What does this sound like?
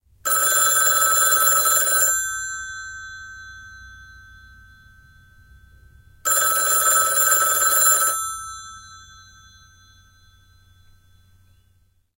Bell System Ringer Model 687A (8-70)
A stereo recording of an old style telephone. This is a genuine Bell System Ringer Model 687A (8/70) made by Western Electric. It's a tan box about 15x13x6 cm. It was usually placed in a warehouse or far from the main phone so people would know they had a call. Recorded with a Sony ECM-99 Stereo Microphone from about 2 feet away to a SonyMD recorder. There may be a little room noise with amplification.
effect, household, ring, soundeffect, telephone